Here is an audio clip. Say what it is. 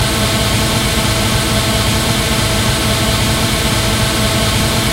Created using spectral freezing max patch. Some may have pops and clicks or audible looping but shouldn't be hard to fix.
Atmospheric, Background, Everlasting, Freeze, Perpetual, Sound-Effect